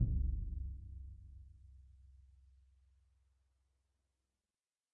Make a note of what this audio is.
Symphonic Concert Bass Drum Vel08
Ludwig 40'' x 18'' suspended concert bass drum, recorded via overhead mics in multiple velocities.